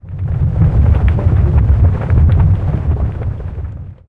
Short earthquake sound from klangmaler-lutz's sound '20-erdbeben'. 2021.01.27 18:27